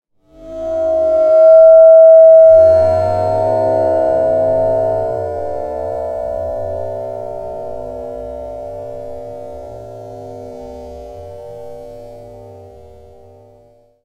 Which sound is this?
Stretched Metal Rub 7
A time-stretched sample of a nickel shower grate resonating by being rubbed with a wet finger. Originally recorded with a Zoom H2 using the internal mics.
metal, time-stretched, processed, nickel, resonance